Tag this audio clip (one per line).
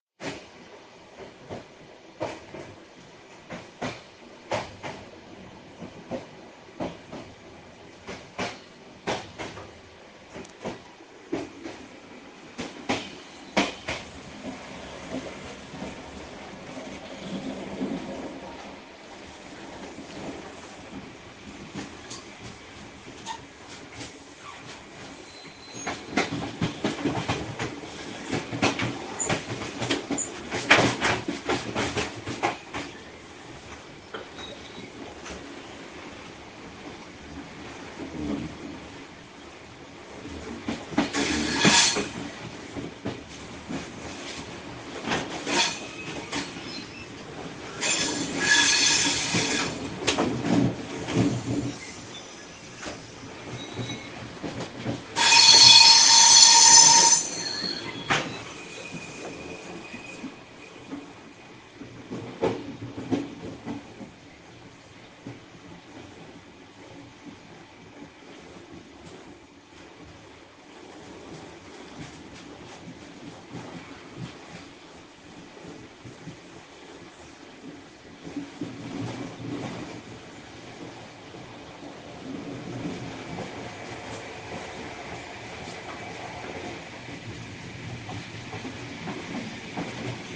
de
ebro
leaving
station
miranda
train
spain